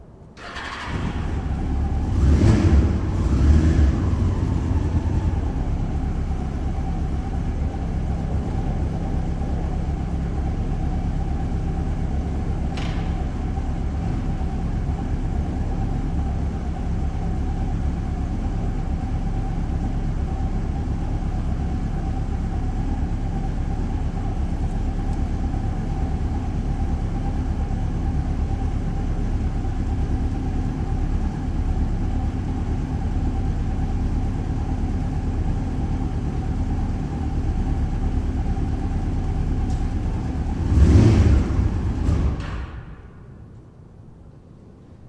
Motor Bike Courtyard
A neighbor was cleaning and warming up his bike in the courtyard.
Recorded with Zoom H2. Edited with Audacity. Normalized, no noise removal.
bike, engine, engineering, garage, motor, motor-bike, vehicle